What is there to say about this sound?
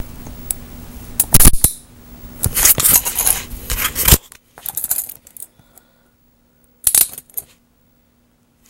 Crappy distorted recording of what sounds like a handcuff.